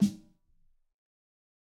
Fat Snare of GOD high tune 003
Fatter version of the snare. This is a mix of various snares. Type of sample: Realistic